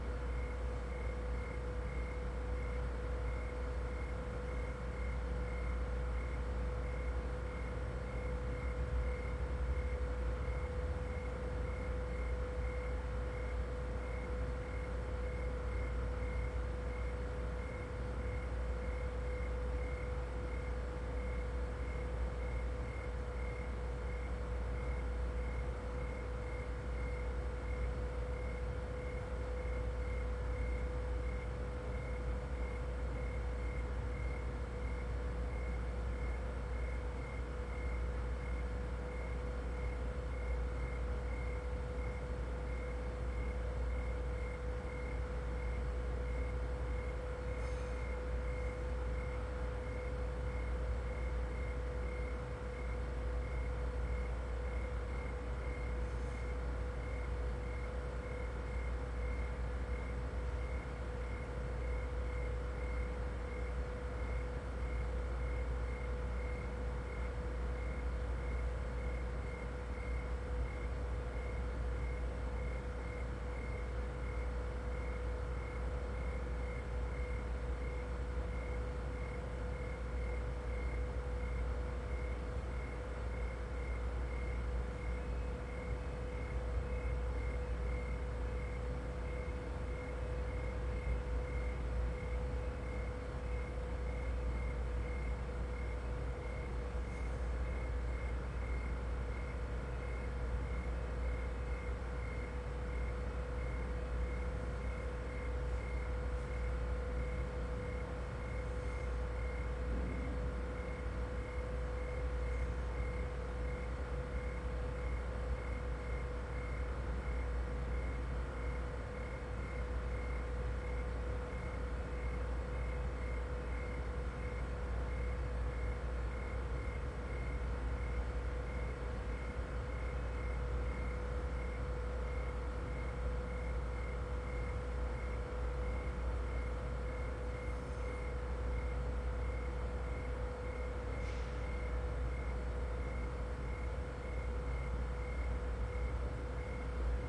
Noisy refrigerator with sound machine playing crickets
I stayed in a studio apartment hotel in Philadelphia and the refrigerator that was in the kitchen (which was essentially also the bedroom) was noisy all night. I attempted to play the sounds of night crickets on a sound machine to "cover it up" to no avail.
The result is what sounds like (maybe) a noisy humming air conditioner on a hot summer night.
machine, conditioner, machinery, air, machine-hum, night, crickets, field-recording, insects, refrigerator, summer